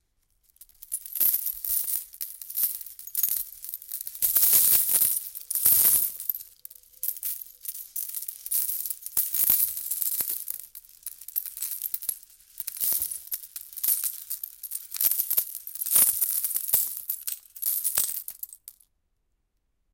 Let's drop a bunch of small seashels into a plastic bag wich contains more seashells. Recorded indoors with zoom's H1 handy recorded.
field-recording, foley, sea-shell